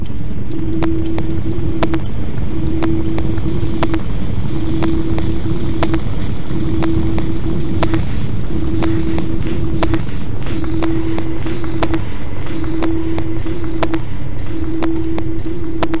Small pyrotechnic action in my house mixed with synthetic and processed pitches with clipped sounds for popping crackles from burning embers... I tried....
contest, fire